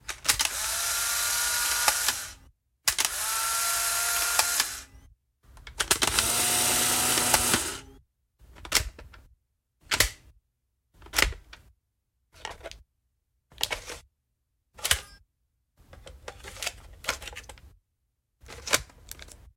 Polaroid Foley
3 shots and some foley here - i plugged a cassette in, took out and made some random sounds. Enjoy.
camera, shutter, photography, polaroid